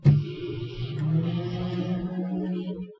microwave start
the start of a microwave cooking
microwave, start, oven